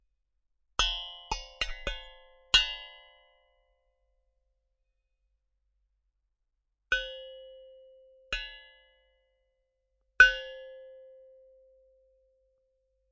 2023-01-07-childrens-toy-2x-contact-012
toy instrument recorded with contact microphones